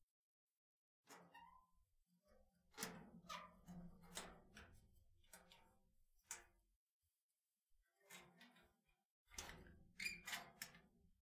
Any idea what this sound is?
metal gate
metal, close, OWI, gate, door, work